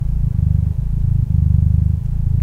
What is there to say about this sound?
This is a recording of a cat motor , a black house cat named spook.
I trimmed it to loop but there is a slight click when looped but can easily be remedied.
This is dry without any effects being a natural sound BUT this sounds real cool of you put reverb on it.